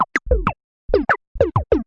SP modular perc t 4 128
electronic, loop, percussion